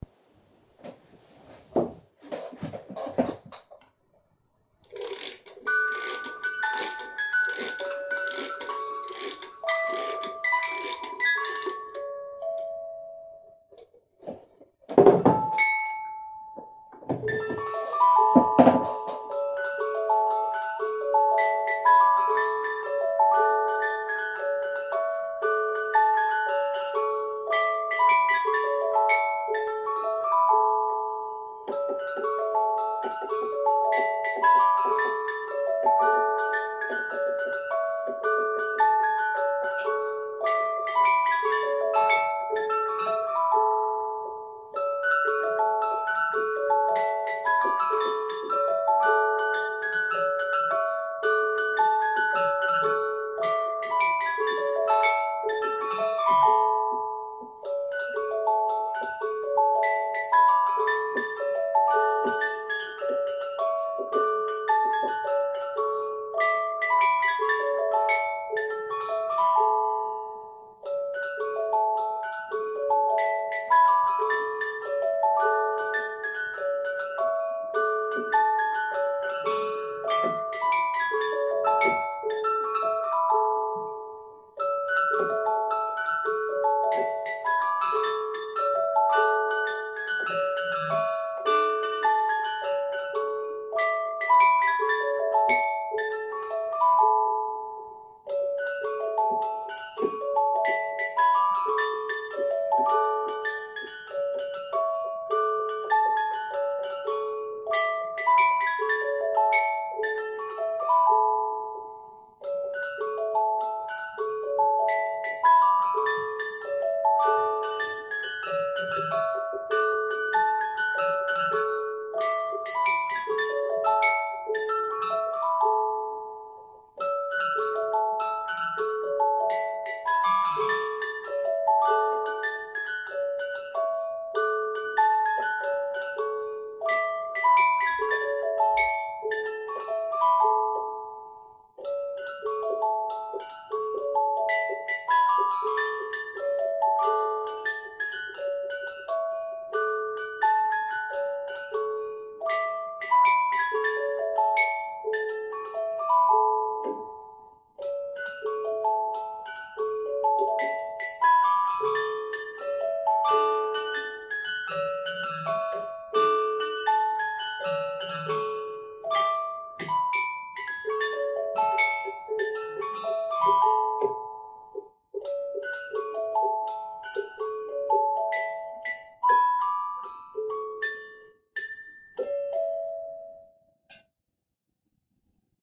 Musicbox: hilltop ceylon morning
This is full sequence of music-box in tea can with screweing up(?) until full stop.
It's gift-set of tin box with tea and onboard music box. First i'm winding up music box, put box on the table, then it plays until full stop.
Then it was slightly edited with Audacity - tiny noise reduction and equalisation (boost frequencies below ~400 Hz, lowered frequencies higher than ~2500 Hz).
mechanical-instrument, mechanism, music-box, musicbox, tea